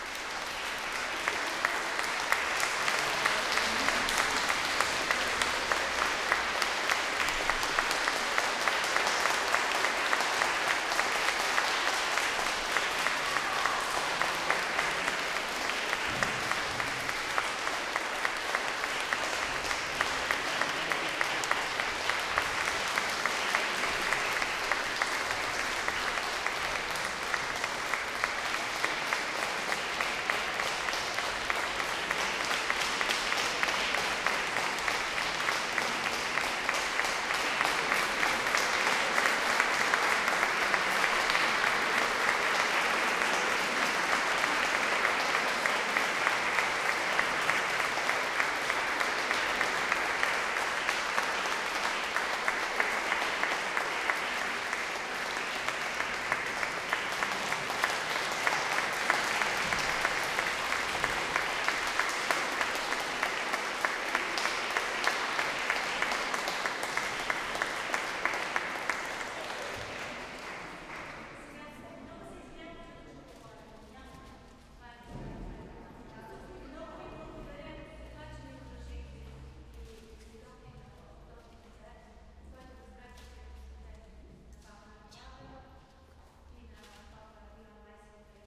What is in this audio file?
cathedral applause
The applauses after a choir concert in a cathedral I've recorded last week. Hope someone will find in useful :)
hand-clapping, applauding, applause, auditorium, clapping, audience, cathedral, clap